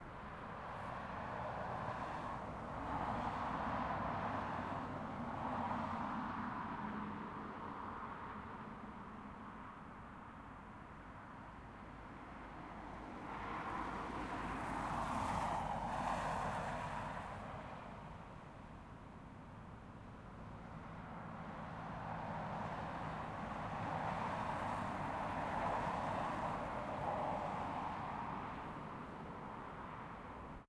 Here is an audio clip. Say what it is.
A highway in Sweden. Recorded with a Zoom H5 with an XYH-5 Stereo mic.
driving, noise, cars, traffic, highway, truck, road